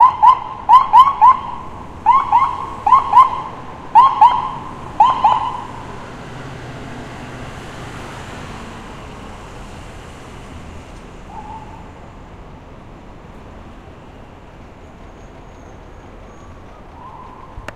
Ambulance siren blips in NYC traffic.